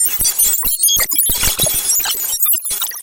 bad telecommunications like sounds.. overloads, chaos, crashes, puting.. the same method used for my "FutuRetroComputing" pack : a few selfmade vsti patches, highly processed with lots of virtual digital gear (transverb, heizenbox, robobear, cyclotron ...) producing some "clash" between analog and digital sounds(part of a pack of 12 samples)